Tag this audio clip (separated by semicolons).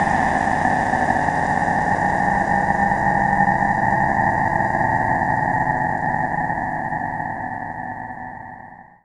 anxious; creepy; drama; ghost; haunted; horror; nightmare; scary; spooky; suspense; terrifying; terror; weird